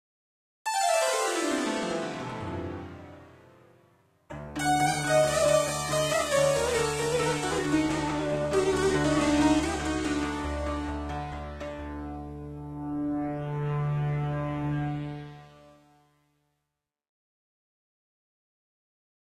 The Roland D-50's Sitar test.
Roland, Sitar